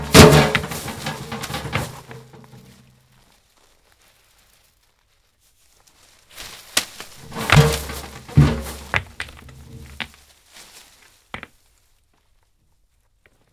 rocks rolling with metal violent hits
Foley SFX produced by my me and the other members of my foley class for the jungle car chase segment of the fourth Indiana Jones film.
hits, metal, rocks, rolling, violent